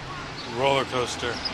newjersey OC rollerloop monoplane
Airplane, boardwalk and street ambiance from next to roller coaster recorded with DS-40 and edited in Wavosaur.
ocean-city, new-jersey, monophonic, vacation, loop